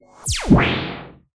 Laser03.2rev
Laser sound. Made on an Alesis Micron.
micron, starwars, zap, synthesizer, alesis, sci-fi, lasergun, laser